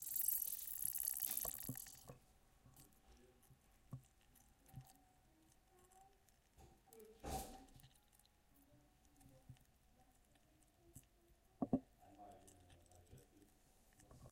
Ice melting
melt-water, snow, mountain, fizz, foley, water, field-recording, ice, abstract, glacier, crackle, melt, melting, fx